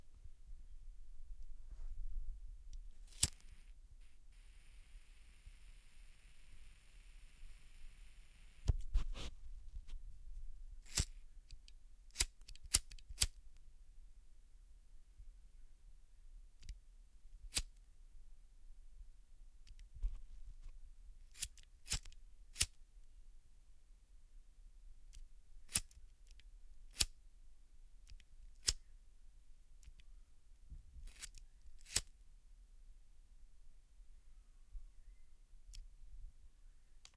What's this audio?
The sound of a lighter
lighter, spark, ignition